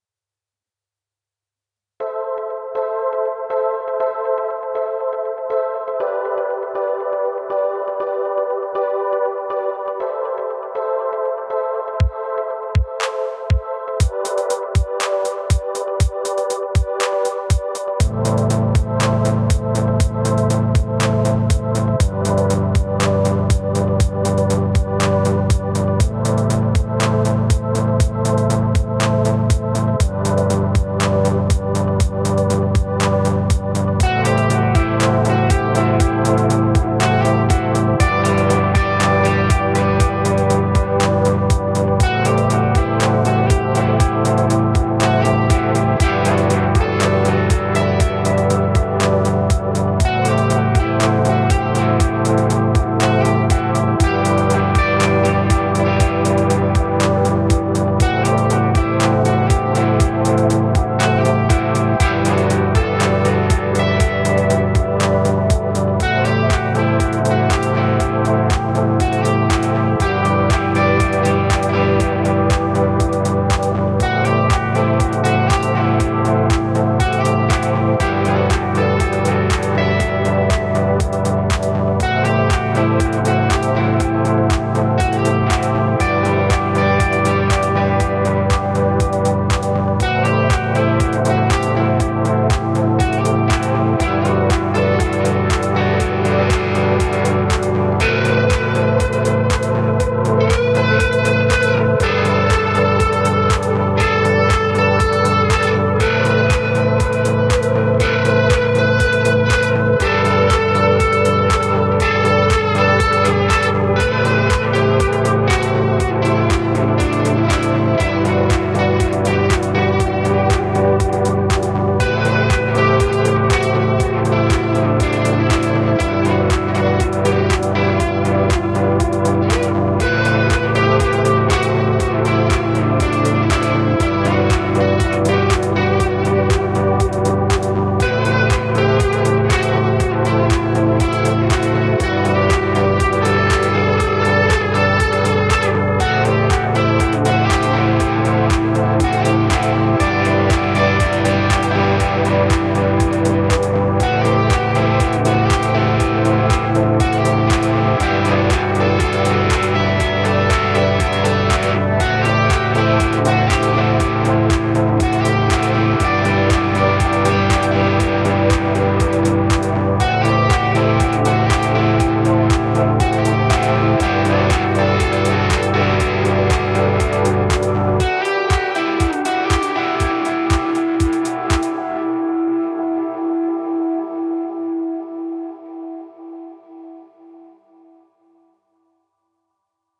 Guitar jam im Am (Ableton live)
This is simple live improvisation with help Abletone live, where I played on my electric guitar. Recorded the guitar, bass, and drums loops for accompaniment, and use an echo-distortion electric guitar for a solo-lead party.
The sequence of chords - Am & G.
Temp - 120 bpm.
It will suit like a soundtrack for your different needs or background music for your podcasts. Enjoy:)